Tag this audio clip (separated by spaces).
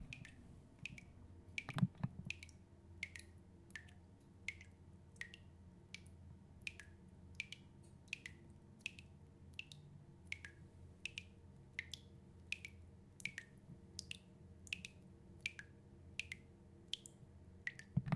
bath dripping leaking bathroom water leak tap